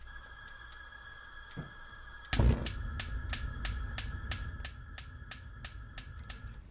turning on a gas stove